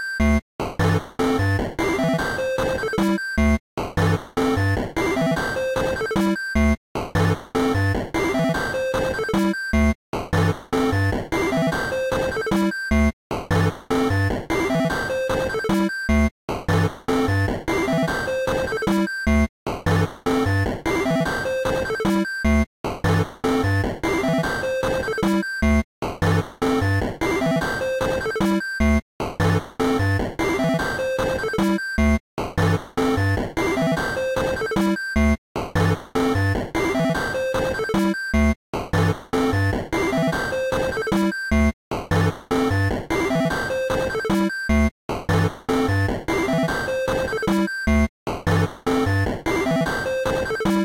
FREE HOTDOGS
8-bit, Video-Game, 8bit, chiptune